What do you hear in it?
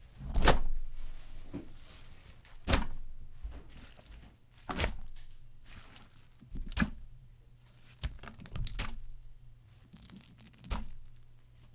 wet slop plop
A wet plopping sound, such as meat falling into a puddle. I needed the sound of a body dropping into a pool of it's own blood. This was created by letting a soaked rag drop onto several sponges full of water from a height of about a foot.
blood, body, fall, mess, moist, organic, plop, rag, slop, wet